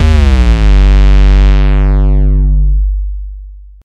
Jungle Bass [Instrument]